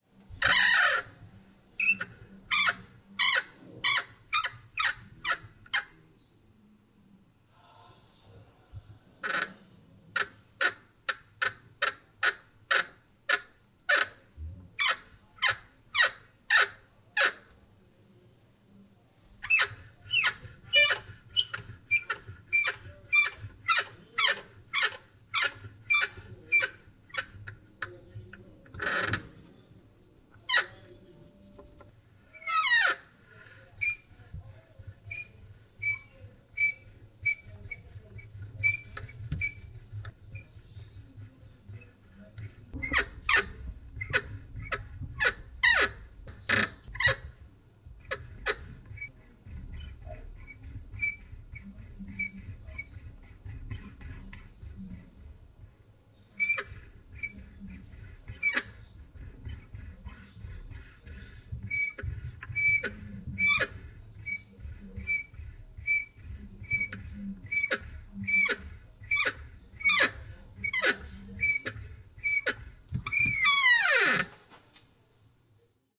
Door Sqeeks 2
This is squeaks from a wooden cabinet door.
Squeak, Metal, Door, Long